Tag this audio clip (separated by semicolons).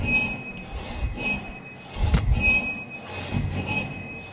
Joao-Paulo-II; Portugal; door; squeaking